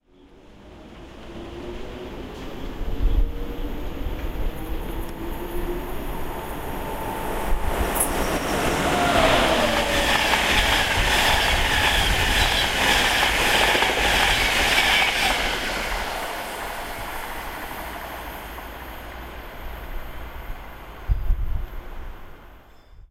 Class 91 leads Mallard 225 Set
Electric Locomotive Class 91 leads a set of Mark 4 coaches nonstop through station in the northern suburbs of London
locomotive, british, train, rail, 91, class